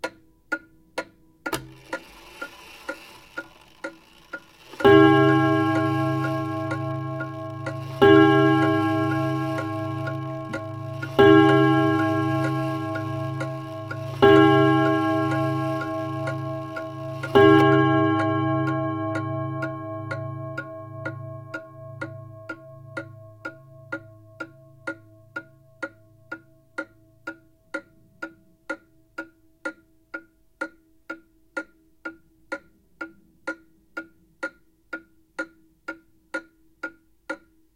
This is an old (pre-1950) Junghans wall-mounted clock. This clip is the clock chiming (or striking). Recorded in living room.